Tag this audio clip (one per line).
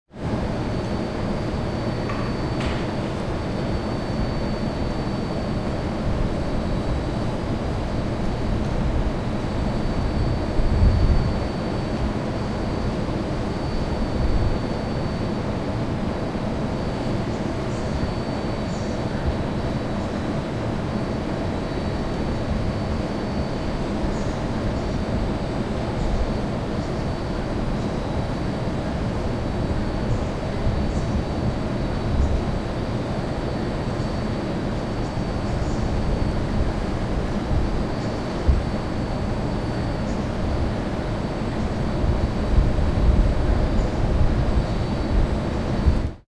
fan
listen-to-helsinki
noise
ambience
city